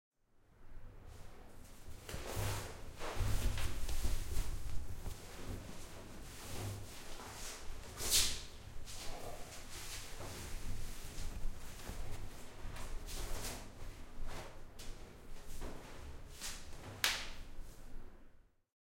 20190102 Taking off my Clothes
Me taking off my clothes.
cloth, clothing, clothes, fabric, undress